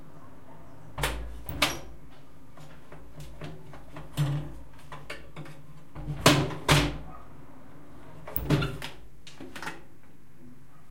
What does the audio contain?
window-close01
Sound of my bathroom's window being closed and locked. Recorded with a Zoom H4n portable recorder.